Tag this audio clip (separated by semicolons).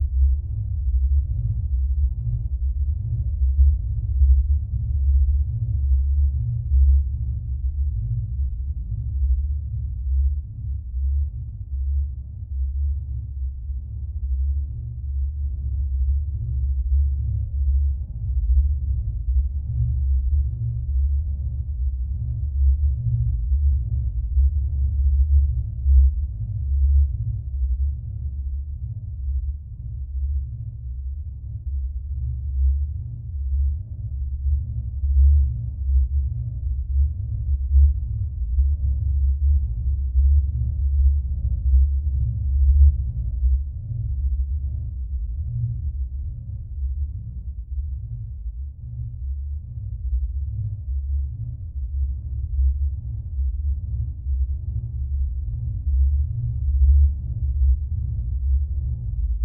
rumbles; Vibrating; Muffled